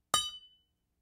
metal pipe 2
Making noise with a 2in galvanized metal pipe - cut to about 2 ft long.
Foley sound effect.
AKG condenser microphone M-Audio Delta AP
effect, foley, pipe, soundeffect